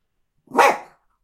Dog Barking Single 4
Jack Russell Dog trying to bite something.
Jack-russell, attacking, attack, fight, lurching, barking, biting, animal, bite, dog, woof, fighting, woofing